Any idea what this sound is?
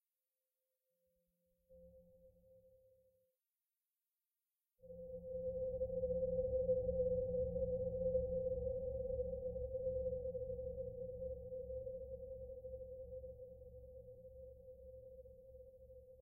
Surround dronetail -05
A sound resembling a whistle in outer space coming from Avalon. This is
a sample from the "Surrounded by drones pack 1" sample pack. It is a
It was created within Cubase SX.
I took a short sample from a soundscape created with Metaphysical
Function, an ensemble from the Electronic Instruments Vol. 2 from
Native Instruments, and drove it through several reverb processors (two SIR's using impulses from Spirit Canyon Audio and a Classic Reverb
from my TC Electronic Powercore Firewire). The result of this was
panned in surround in a way that the sound start at the center speaker.
From there the sound evolves to the back (surround) speakers. And
finally the tail moves slowly to the left and right front speakers.
There is no sound for the subwoofer. To complete the process the
samples was faded at the end and dithered down to 16 bit.
deep-space, drone, ambient, space, surround